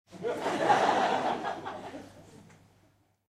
LaughLaugh in medium theatreRecorded with MD and Sony mic, above the people